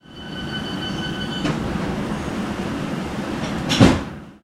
The tube train doors closing. First we hear warning beeps then the door slides shut. Recorded 19th Feb 2015 with 4th-gen iPod touch. Edited with Audacity.